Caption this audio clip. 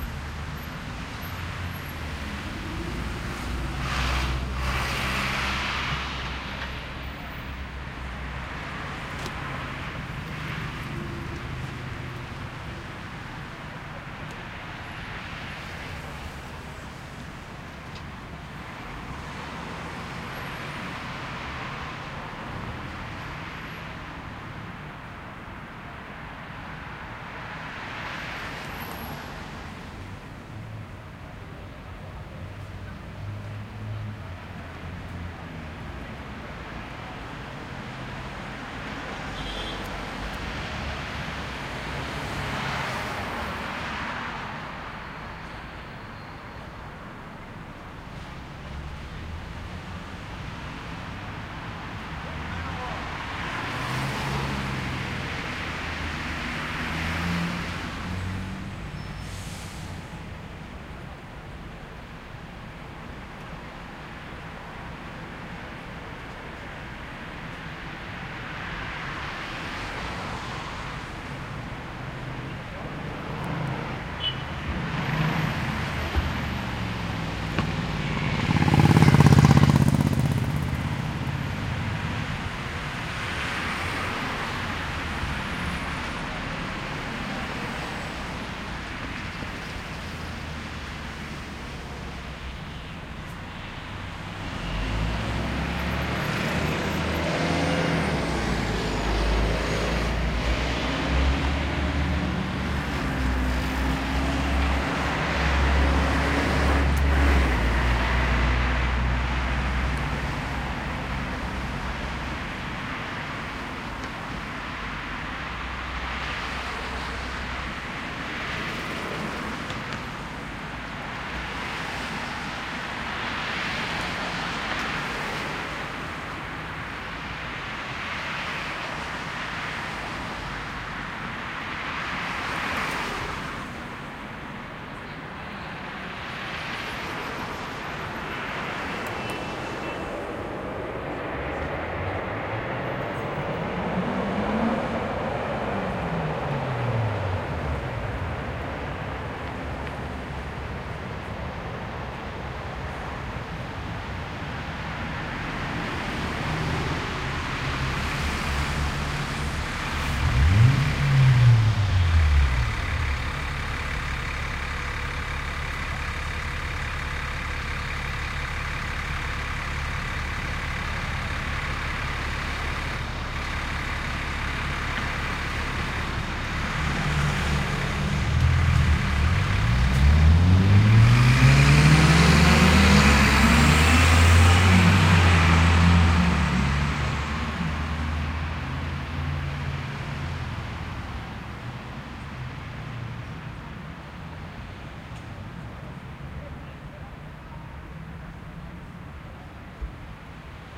Evening recording at 'The Hub' in the suburb of Newtown, Sydney, Australia. Recorded in stereo with a Blue Microphones Mikey, using the FiRe app on an iPhone 3G.